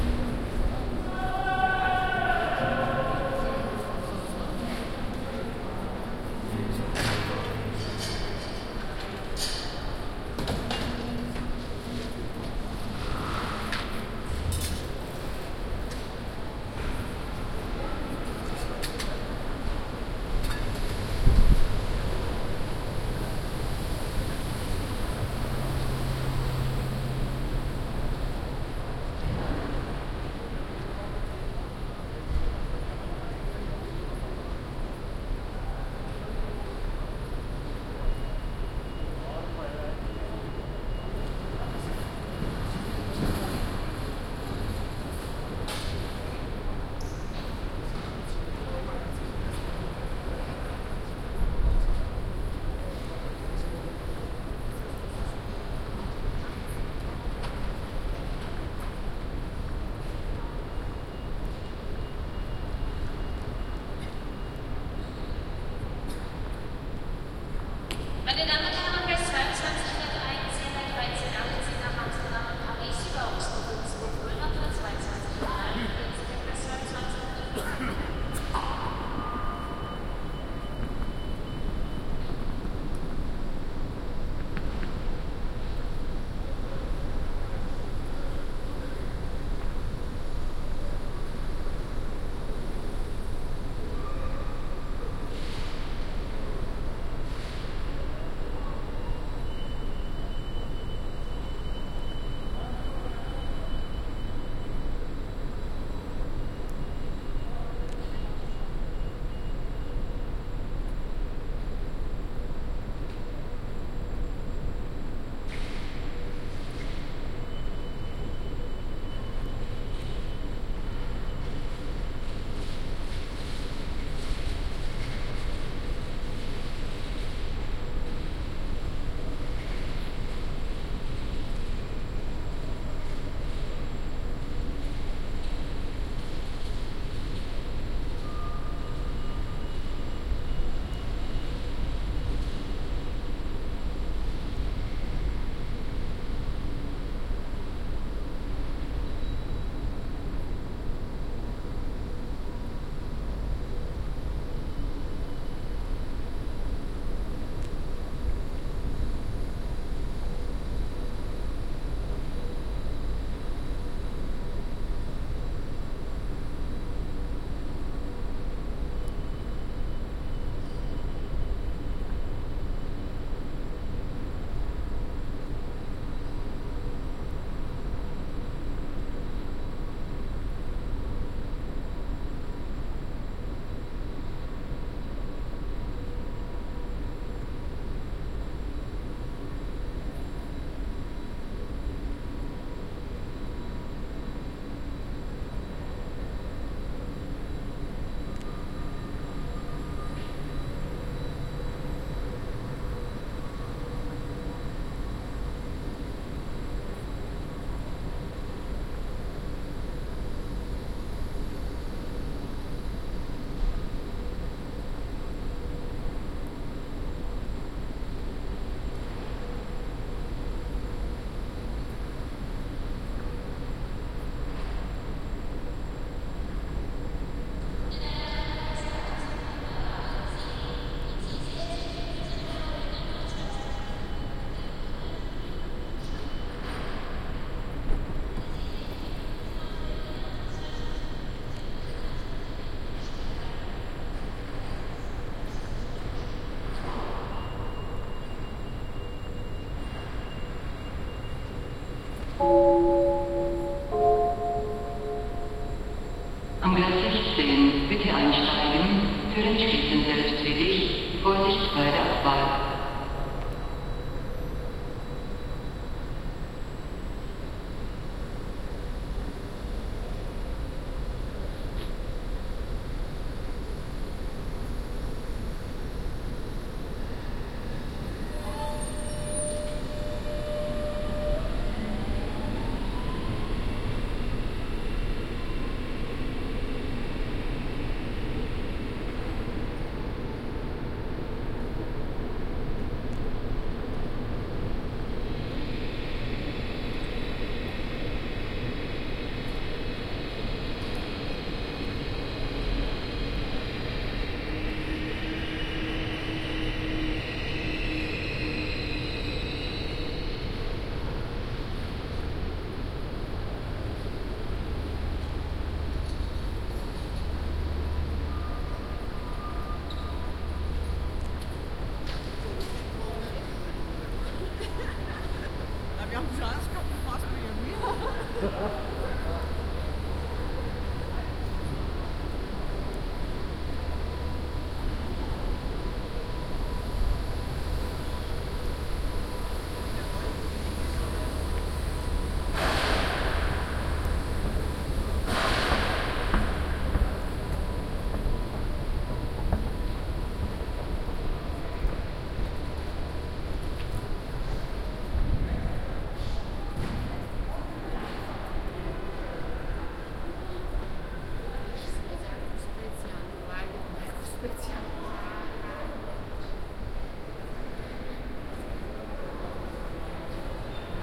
Binaural recording. Used in-ear microphones. It's the ambient sound of the Munich Central Station's platforms.
central, platforms, munich, station, train, field-recording, ambient, binaural